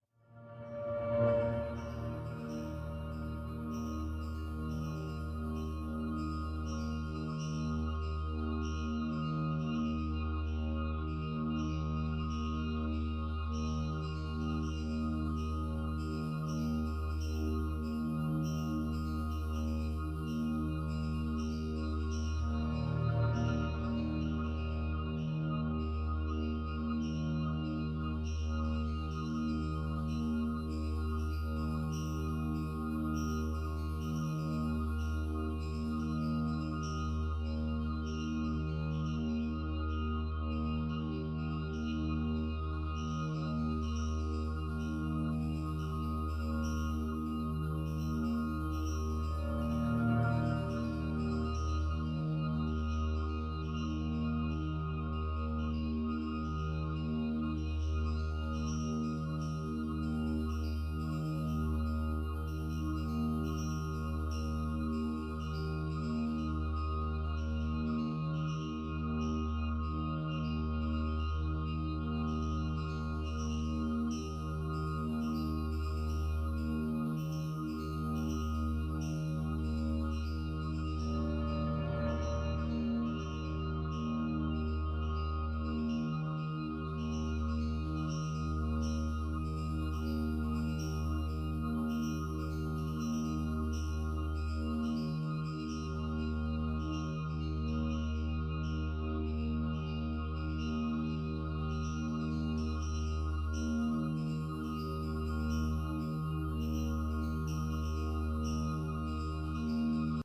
Dark Drone 4
A spooky synth drone for ambience. Long enough to be cut down to a desired length, but simple enough that you could probably find a good looping point if you need it longer.
Ambience, atmosphere, background, bass, cinematic, creepy, dark, deep, digital, dramatic, drone, electronic, horror, melodic, music, musical, sci-fi, sinister, sound, soundtrack, spooky, stab, sting, suspense, synth, synthesized, synthesizer, weird